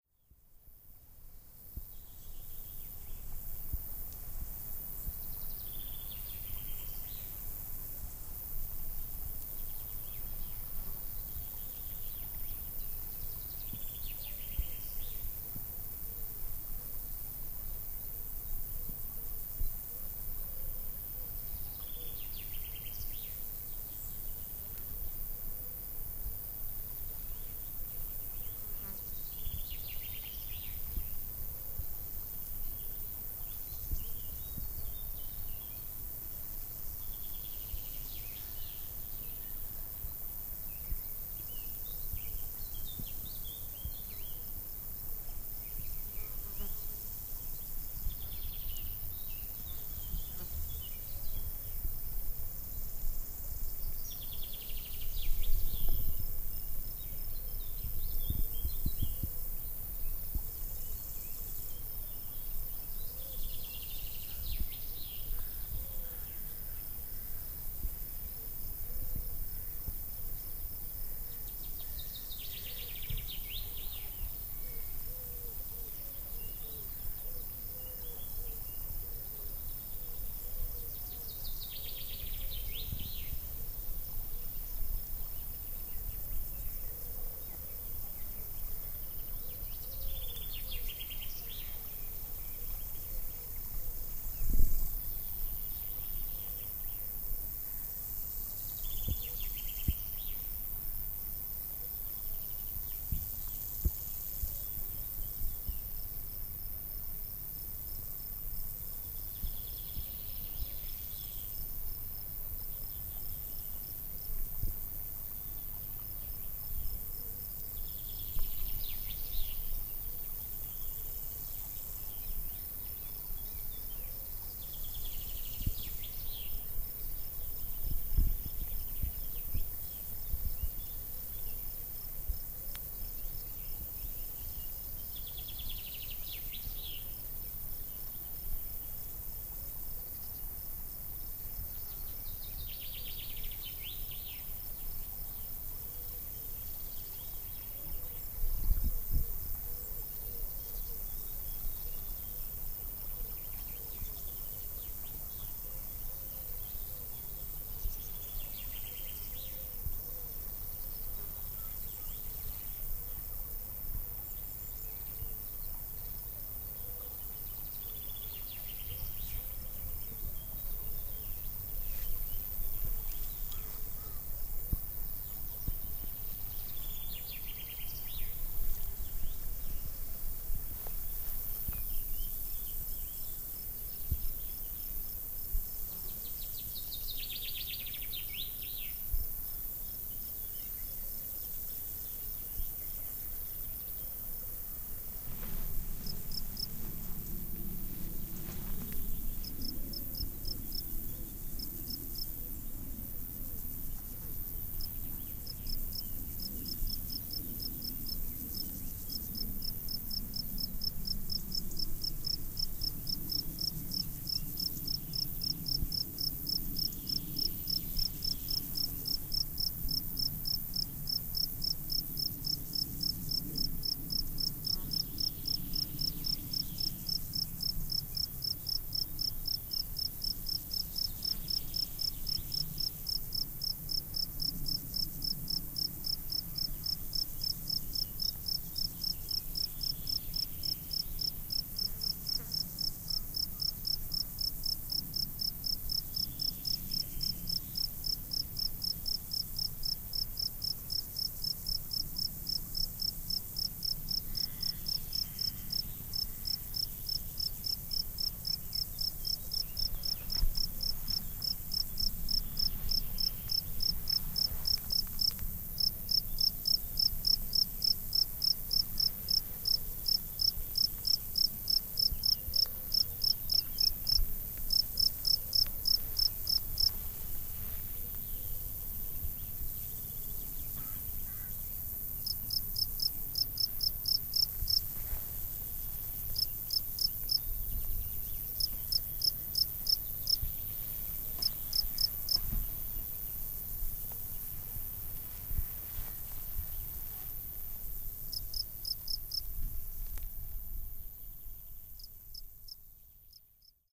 Hilly Fields Park, London, UK